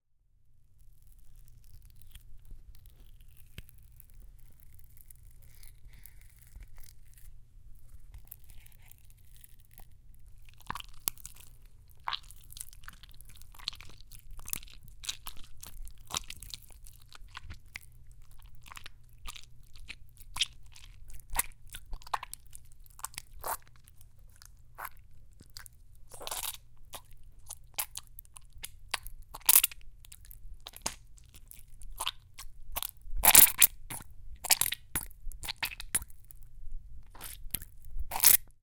Miked at 4-6" distance.
Egg pulp squished between fingers.
fluid, gelatinous, organic, squirt, squish